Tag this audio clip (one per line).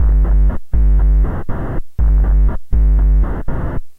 distortion
minimal
lo-fi
loop
noise
hard
beat
bass
drum